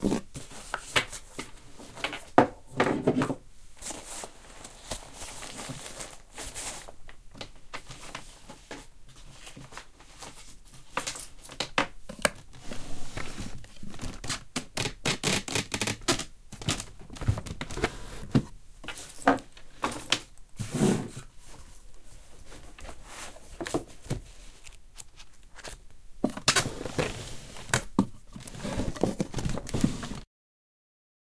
Going through a domestic drawer
Going through the contents of a domestic drawer.